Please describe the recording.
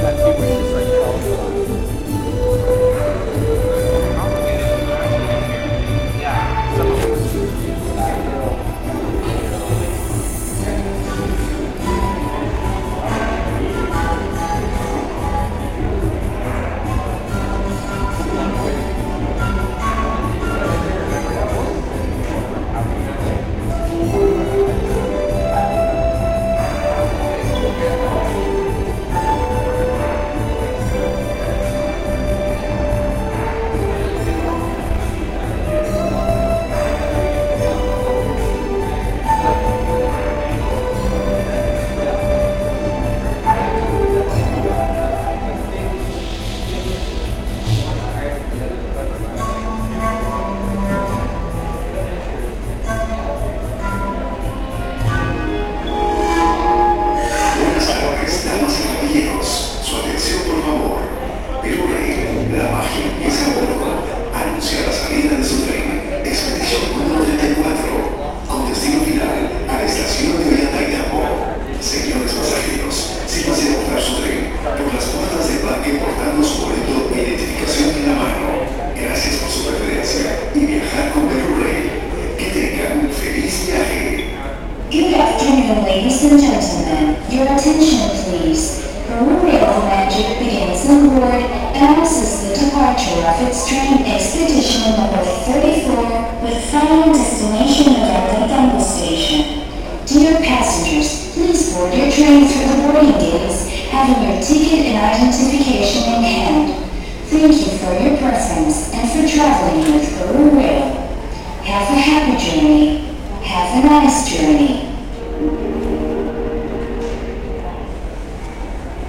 MachuPichu Station
The train station in Machu Picchu with an announcement and a traditional performer playing.
-Sputnik
Machu, Picchu, Performer, Train, Station, Announcement